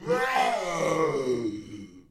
Damaged 4 - The Ridge - Host
Part of a screaming mutant I made for a student-game from 2017 called The Ridge.
Inspired by the normal zombies in Left 4 Dead.
Recorded with Audacity, my voice, friends and too much free-time.
mutated, mutant, zombie, scary, crazy, bioshock, left4dead, mutation, sci-fi, fear, alien, screaming, monster, attack, thrill, terror, horror, criminal, creepy